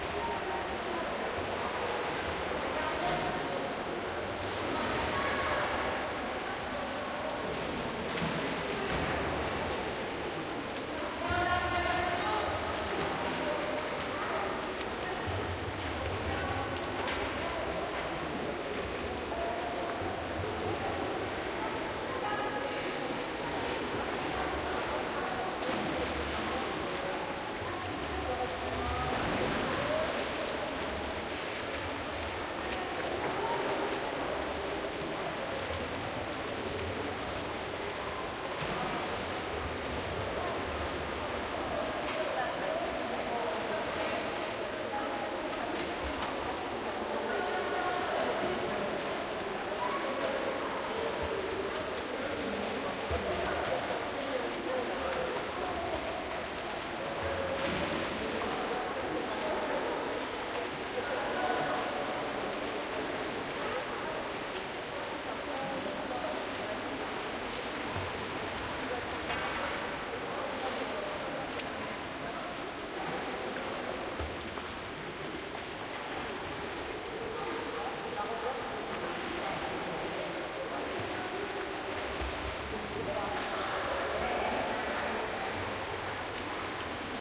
Swimming pool , indoor, close
Strasbourg old municipal baths in the main swimming pool.
Close
1 boom schoeps Mk 41
2 and 3 Stereo Schoeps ortf